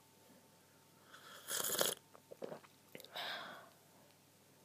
drinking, sip, swallow